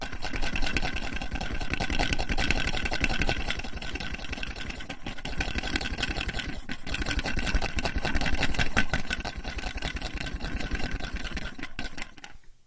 One of those "never needs a battery" LED flashlights in stereo.
shake, led, capacitor, flashlight, stereo